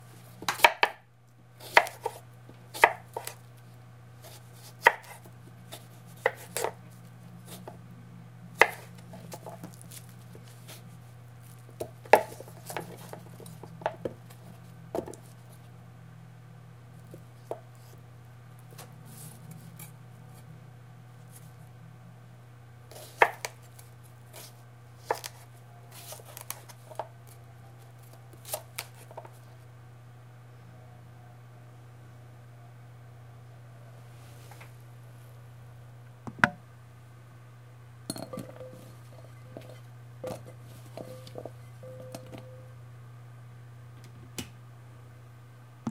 Chopping Salad Knife
recorded on a Sony PCM D50